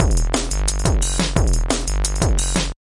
loop drum dnb bpm 176
made on korg em bpm 176
dance, bass, korg, groovy, beat, Snares, percussion-loop, kick, dnb, drum, drums, drum-loop, dubstep, loop